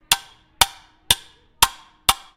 Spoon on Pepsi Can
soda, Spoon, can